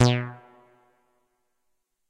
MOOG BASS SPACE ECHO B
moog minitaur bass roland space echo
roland, minitaur, echo, bass, space, moog